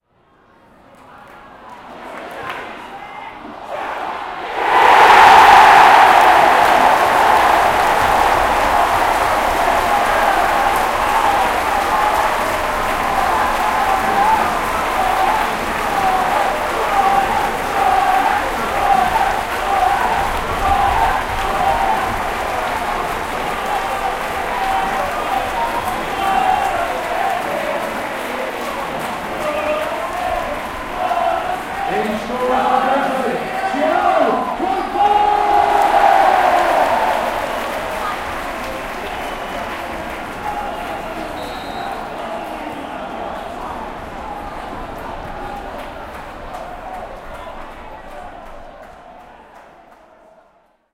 Football-crowd-GOAL
I needed small stadium sound effects for a play about the local football club, Brentford FC. The club, very graciously, gave me free access around the ground to home matches early in the season 2006/7. Unfortunately, Brentford found it very difficult to score goals. This is one of the two they managed whilst I was recording.